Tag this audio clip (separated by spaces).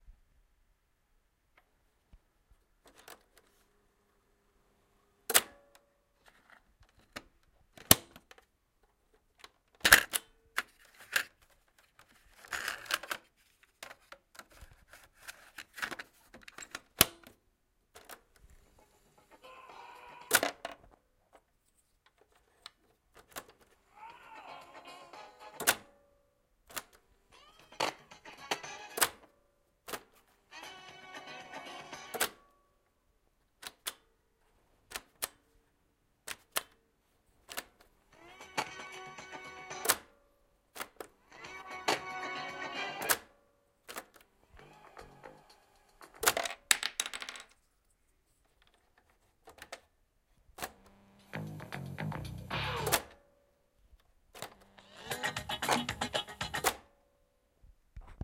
broken buttons cassette clicks deck eject glitch pieces player recorder tape